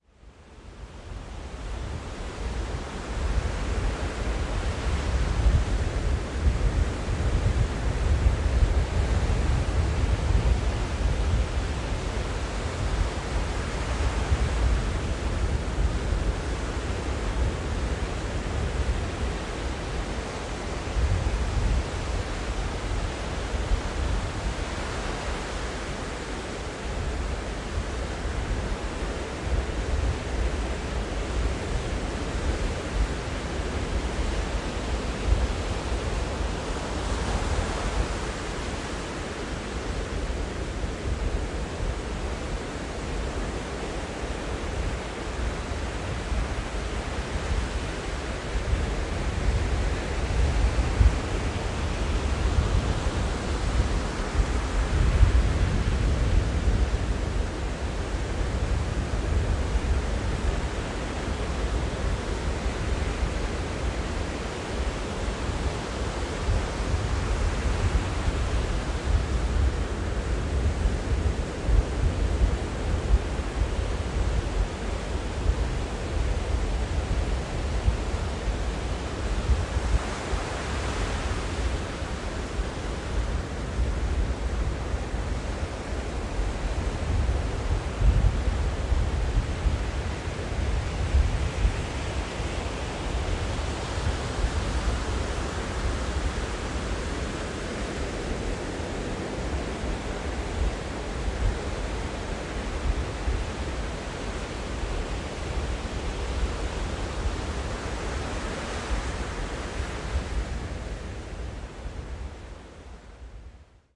windy night at the beach
The sound of ocean waves breaking on a sandy beach on a very windy night - windy enough that I wasn't able to prevent or remove the wind noise. Recorded at Caloundra using a Zoom H6 XY module.
beach, gale, ocean, sea, shore, surf, water, wave, waves, wind